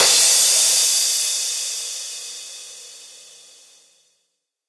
I Used 3 different recordings of a cymbal crash and layered them. Used EQ, and some reverb. Enjoy!